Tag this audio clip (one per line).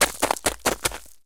cold
crunchy
crunching
steps
ice
footstep
winter
snow
feet
water
walking
footsteps
crunch
walk